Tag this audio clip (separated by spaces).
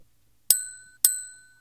BELL GARCIA MUS153